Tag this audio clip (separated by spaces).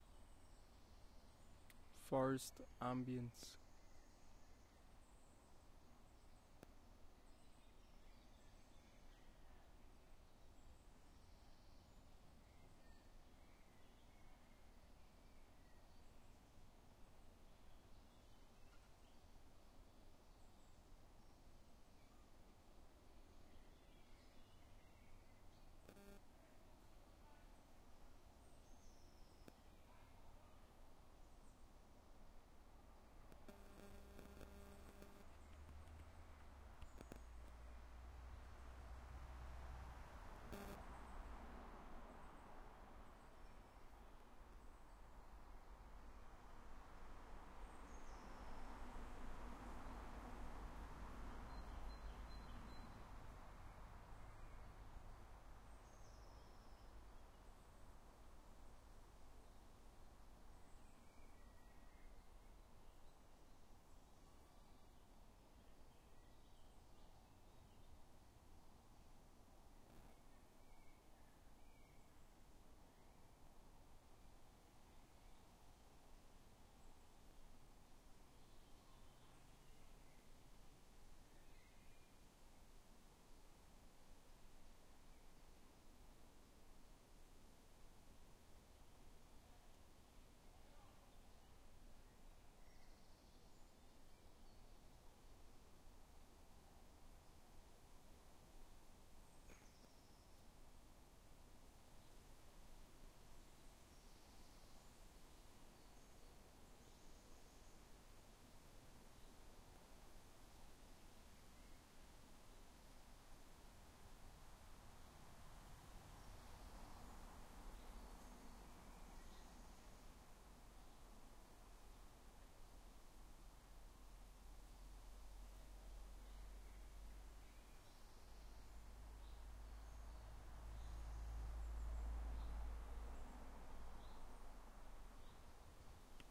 soundscape river nature ambience wind summer birds ambiance ambient field-recording general-noise forest